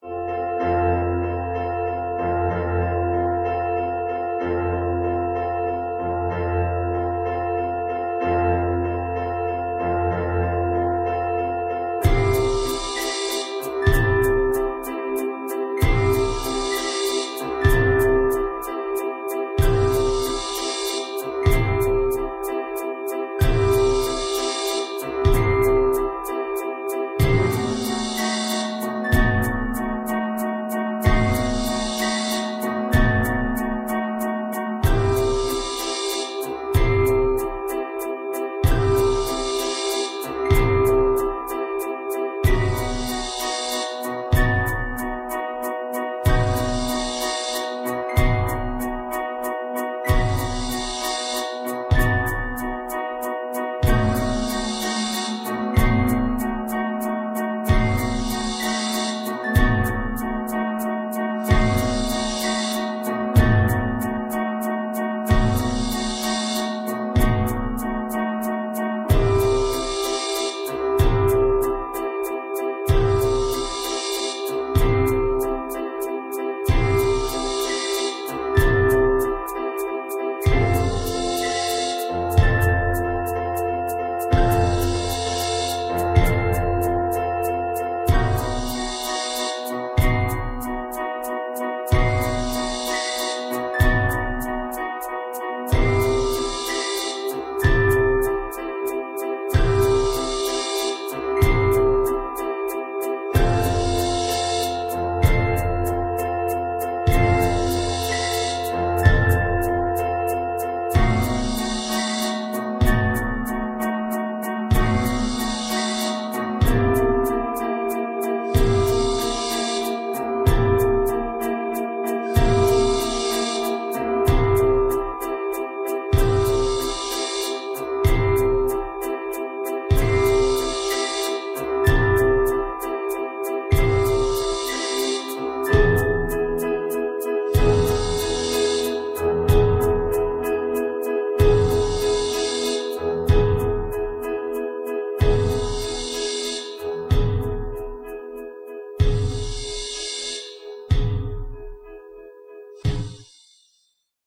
Piano Loop good for any back drop